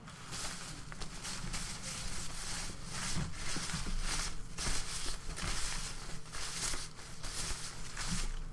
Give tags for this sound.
bushes; free; sound

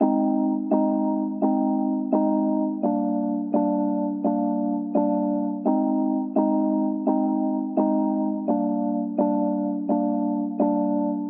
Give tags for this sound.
hip-hop; fun; tyler; progression; tyler-the-creator; ambient; summer; chill; keys; loop; synth; trap

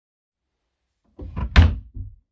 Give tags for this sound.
closing-door; wood; closet; wooden; closing-drawer; wood-door; close; closing; shut; drawer

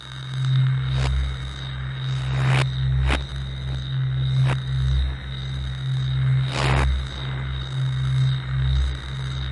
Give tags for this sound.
fx; lightsaber

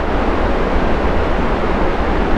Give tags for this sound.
Thrust; looping; jet; rocket

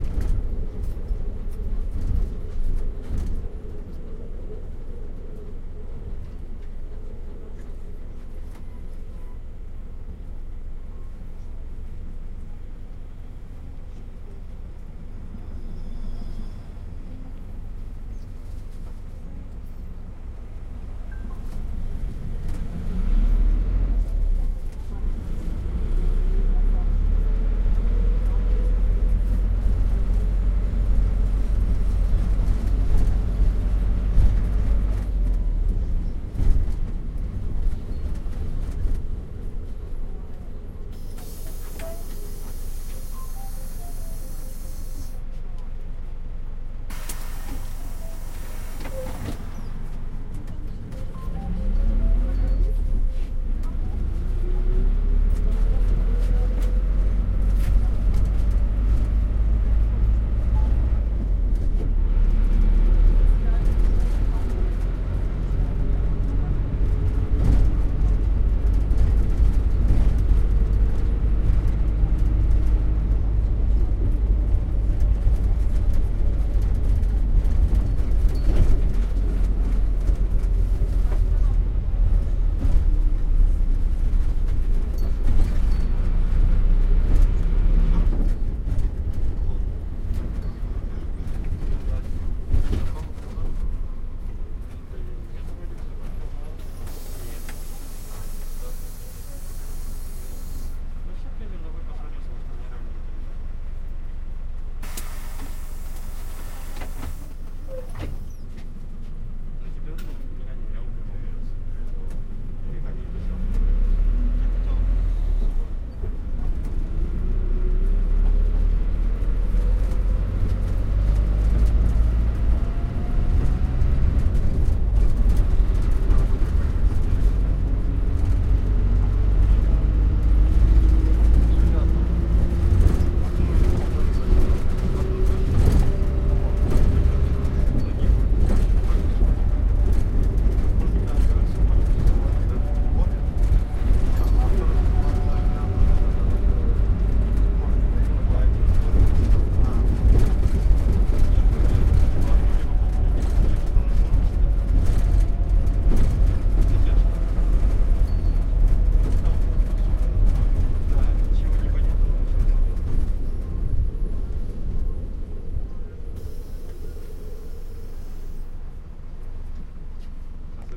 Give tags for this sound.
bus; Minibus; Route; taxi

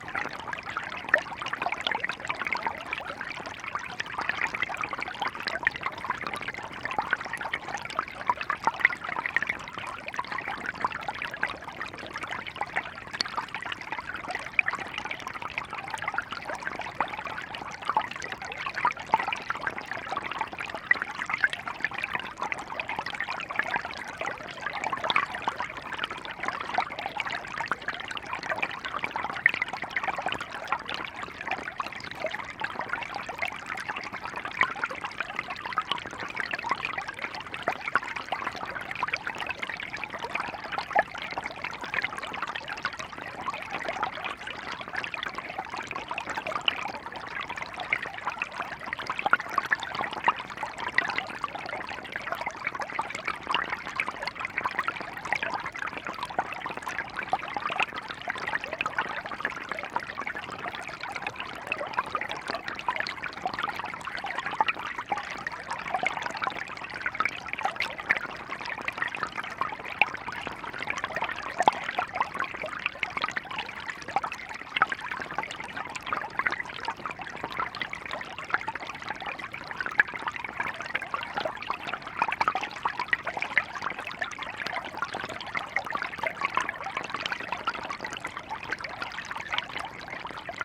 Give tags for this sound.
brook; field-recording; flow; hydrophone; nature; outdoor; stream; water

